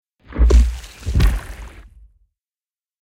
gore hit 3
Some sounds designed from only animal sounds for a theatre piece i did.
low, cinematic, game, stinger, sound-design, kick, gore, hit, horror, Animal